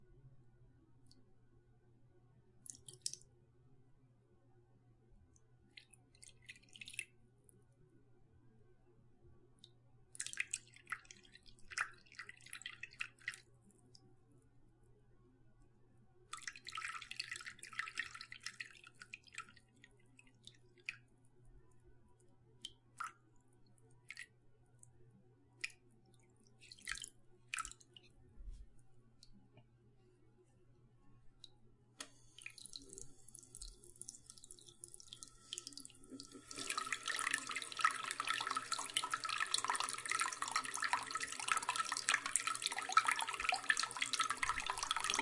Water being poured from a cup into a partially full sink. Faucet then gets turned on.